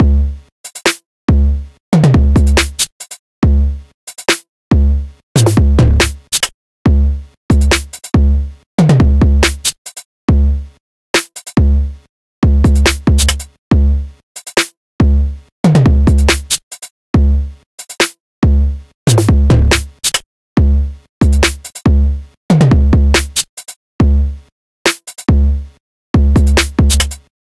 Drum kit
The drum loop runs at 140 BPM and the buzz on the bass/kick drum isn't audible when layered with a track